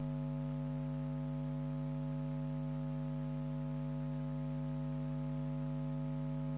[Elektrosluch] 50Hz Transformer
Electromagnetic field recording of a 50Hz transformer using a homemade Elektrosluch and a Yulass portable audio recorder.
50Hz, 8bit, buzz, electromagnetic-field, elektrosluch, hum, transformer